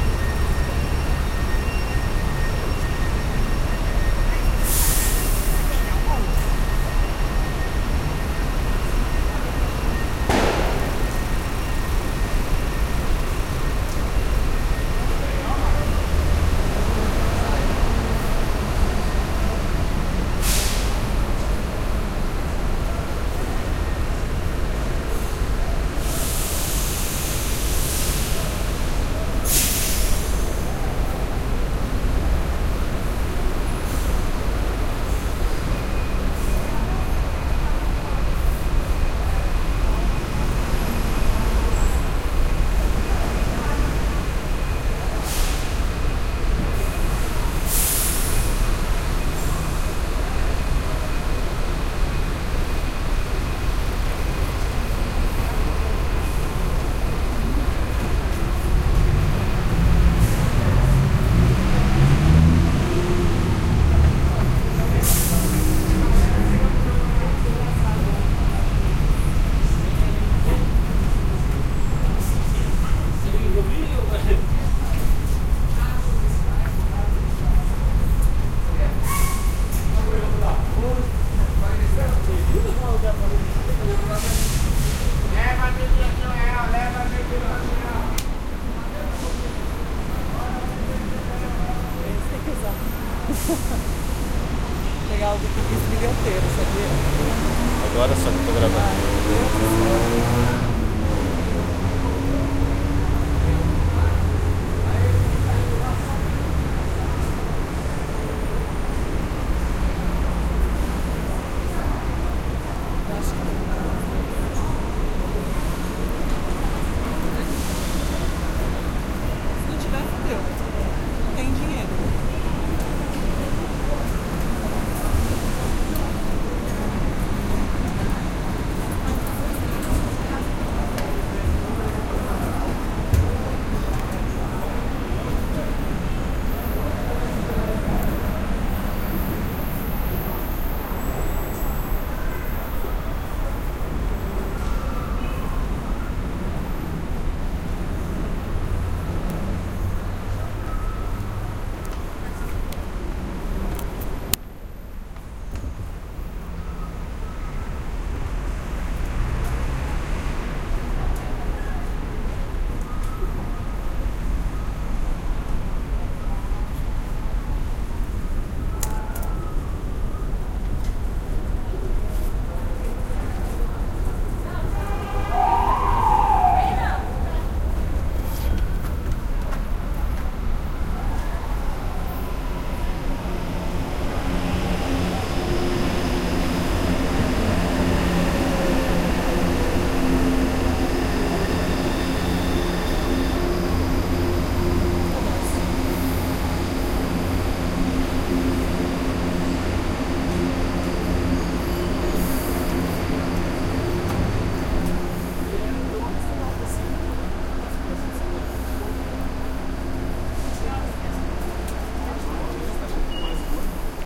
Recorded with Roland R05 - Noisy street in Tatuape, Sao Paulo, Brazil